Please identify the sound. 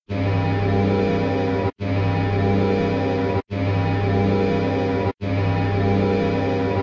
Drive on lawnmower reverse more robotic
Sound recording of a ride-on-lawnmower that has been processed to give it a sound-design quality.